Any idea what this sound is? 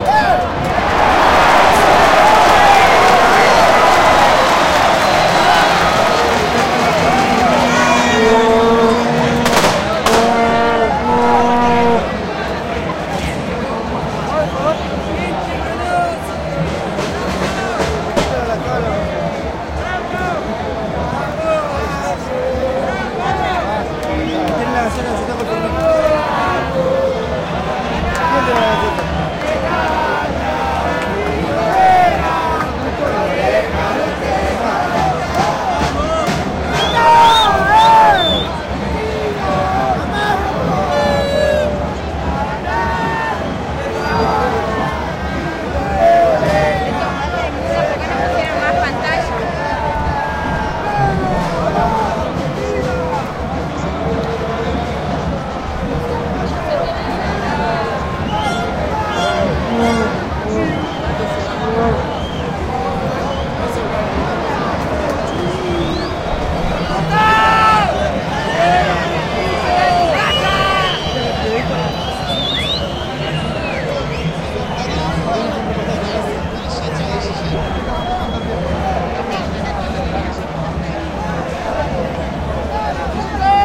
people football argentina
People shouting in the streets of Buenos Aires 2014 on 2014 Mundial Football
Digital Micro Zoom H2